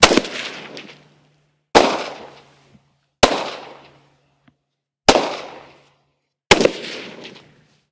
M16 Rifle Shots
Soldiers shooting M16 standard issue US military rifle weapons.
bullet, united-states-army, firing, rifle, military, live-fire, target, projectile, warfare, shoot, explosion, shooting, riflewoman, explosive, practice, technology, game-sound, soldier, riflemen, arms, rifleman, weapon, war